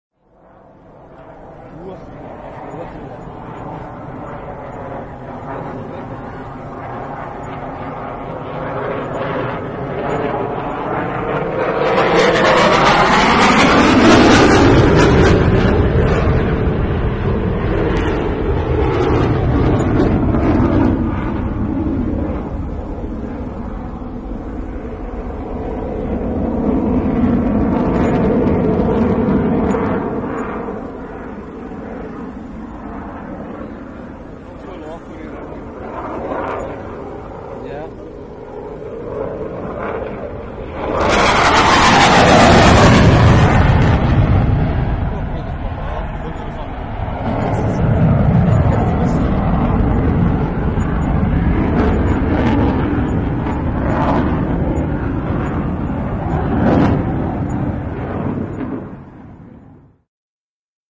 Gripen flypast3
Yet another flypast.
fast, fly-over, loud